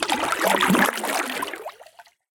Water Paddle med 011
Part of a collection of sounds of paddle strokes in the water, a series ranging from soft to heavy.
Recorded with a Zoom h4 in Okanagan, BC.
boat, paddle, splash, water, zoomh4